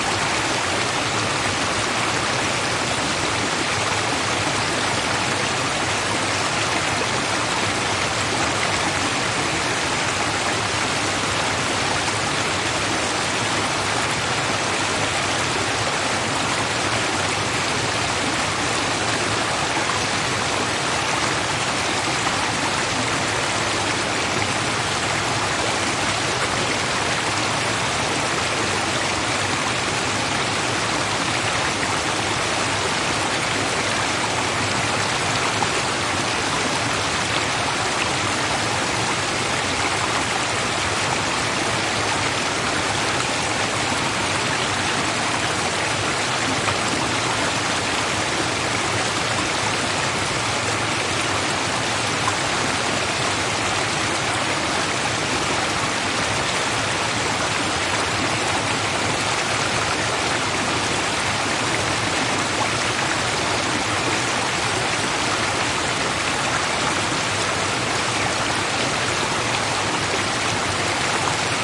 water river Guber natural water dam close perspective stereoM10
This sound effect was recorded with high quality sound equipment and comes from a sound library called Water Flow which is pack of 90 high quality audio files with a total length of 188 minutes. In this library you'll find various ambients and sounds on the streams, brooks and rivers.
ambient, atmo, atmosphere, brook, creek, dam, detailed, effect, flow, liquid, location, natural, nature, relaxing, river, sound, soundscape, splash, stream, subtle, water